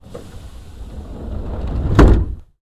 Budapest Metro line 2. The doors are closing. Made by three recordings clipped together.